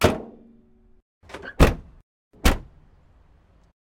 Peugeot 206 - Door Closing Ext
vehicle, peugeot, car, 207